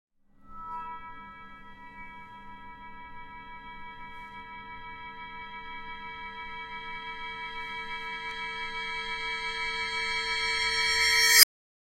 Bell 1 reverse
6.5" Light Bell Cymbal recorded with RODE NT1-A to M-audio FastTrack Ultra 8R Reversed in Reason 6.5 DAW.
Bell, Reverse, 65, Cymbal